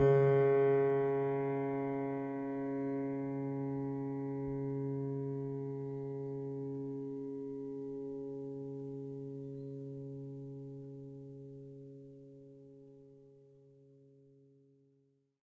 a multisample pack of piano strings played with a finger
fingered, multi, piano, strings